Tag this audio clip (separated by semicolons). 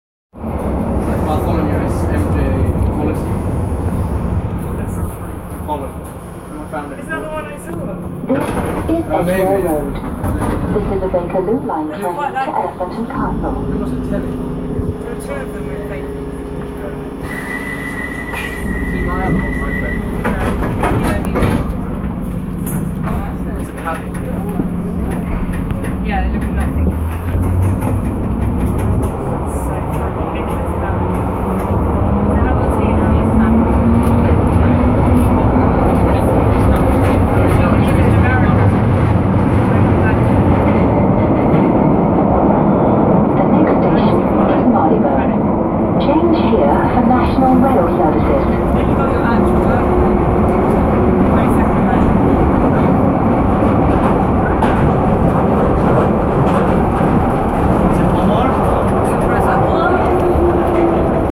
transport; tube; london; london-underground; train; underground